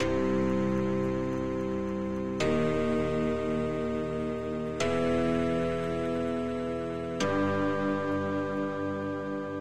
100 Hot Rain Synth 02
bit, dirty, crushed